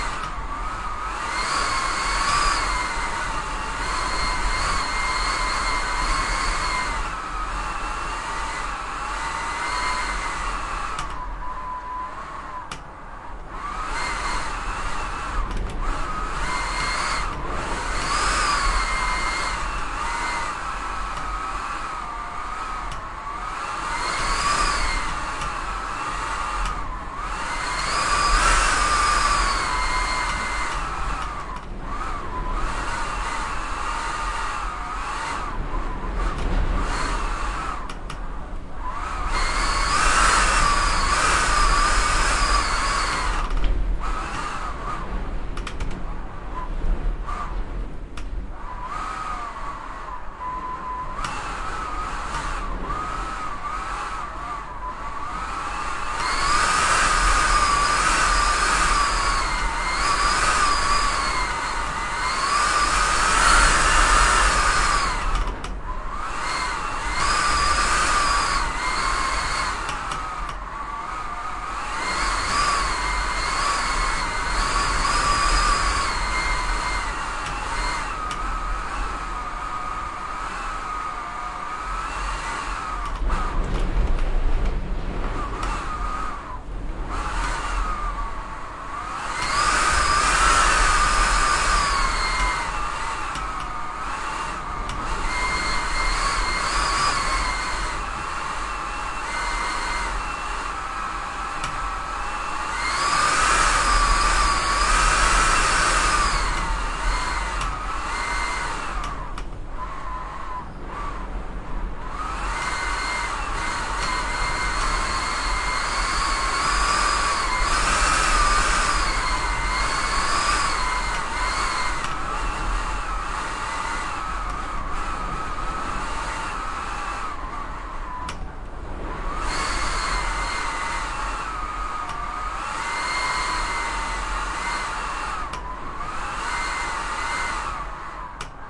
Recorded again with the lunch room clock stopped, just in case that you notice it in the other recording and don't want it.
Recorded with a Zoom H4n.
Credit is optional: don't worry about it :) completely free sound.
house, indoor